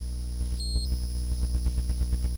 Electronic hum/buzz noises from the Mute Synth 2.